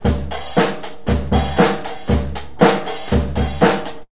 In this recording you can hear me playing the drums. It is a very bad recording because my equipment is not the best at all and I recorded down in my cellar where the acoustic is not very good!
bad-recording, drums